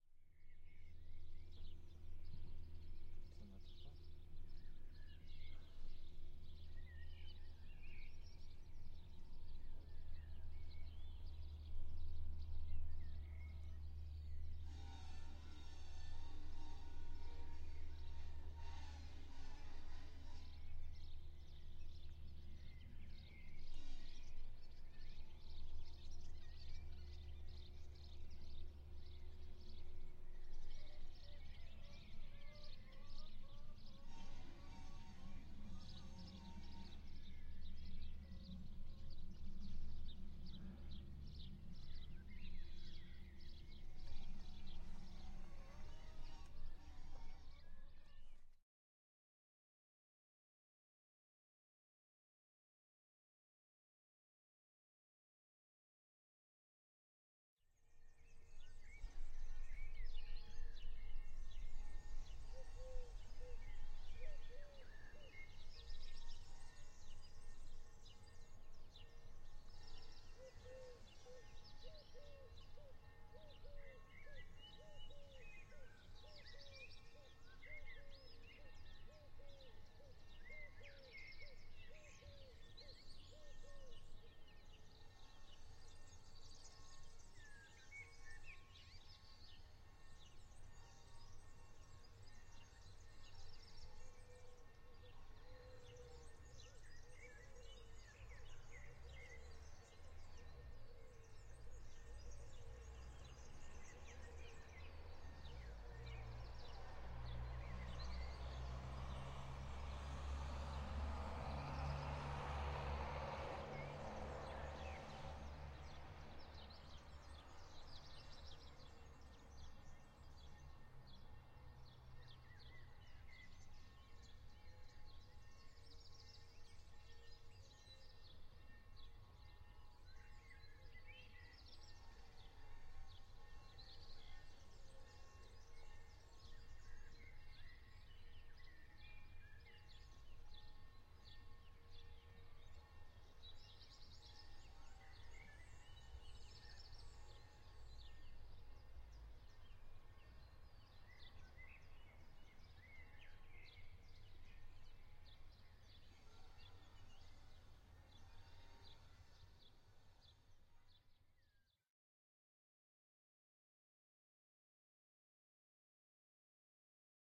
Atmosphere recorded around 1 pm.